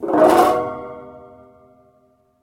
mar.gliss.resbars.damp.buzz1
Sample of marimba resonance pipes stroked by various mallets and sticks.
gliss, pipes, resonance